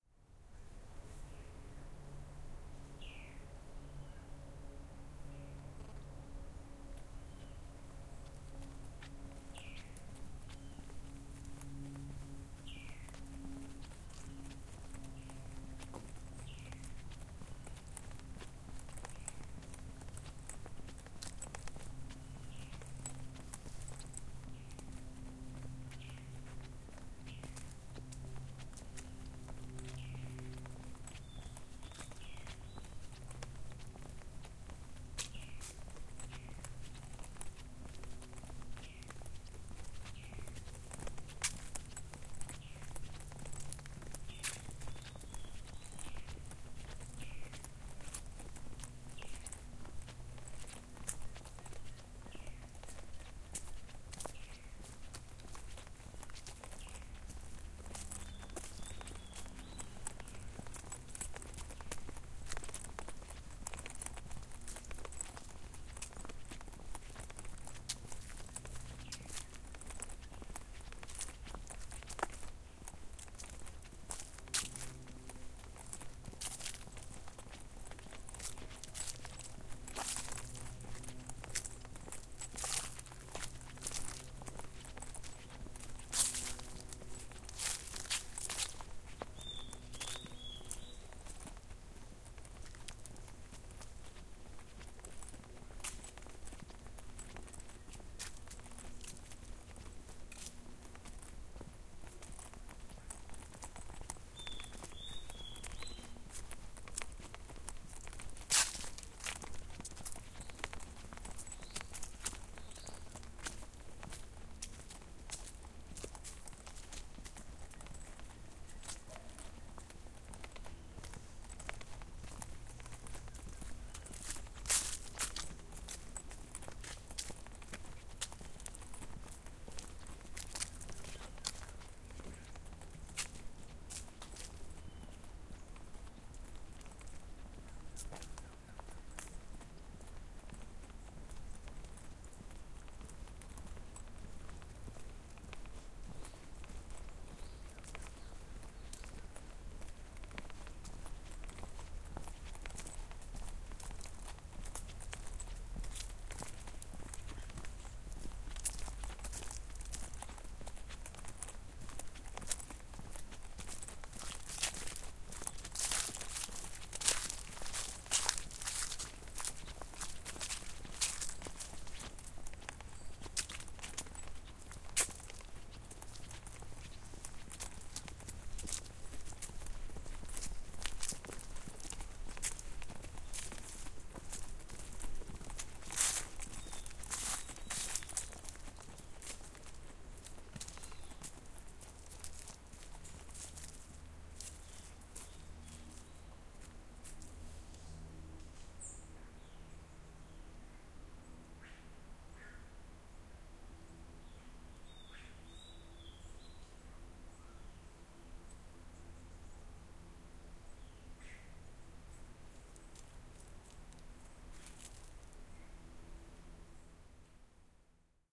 steps in the woods 04
walking in a urban forest, with leaves and foliage on ground.
birds on background.
footsteps, forest, steps, walk, walking, walks, woods